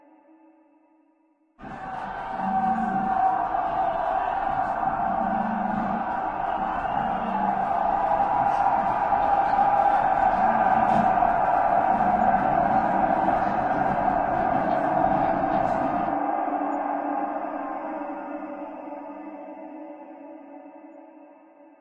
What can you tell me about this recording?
LAYERS 002 - Granular Hastings - G0
LAYERS 002 - Granular Hastings is an extensive multisample package containing 73 samples covering C0 till C6. The key name is included in the sample name. The sound of Granular Hastings is all in the name: an alien outer space soundscape mixed with granular hastings. It was created using Kontakt 3 within Cubase and a lot of convolution.
artificial, drone, multisample, pad, soundscape, space